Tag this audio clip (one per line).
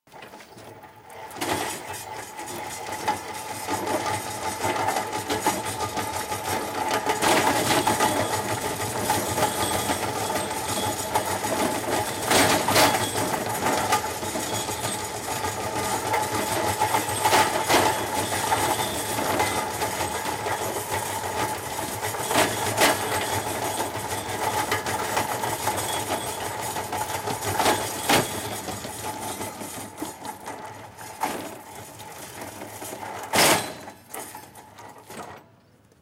hallway
hospital
rattle
rhythmic
trolley
wheels